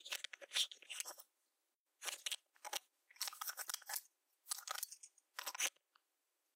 This is a recording of me opening my flashlight, taking out the battery and putting it back in and closing the flashlight again.
It's been a while since I uploaded anything, and I know how common flashlights are in video games so I thought why not.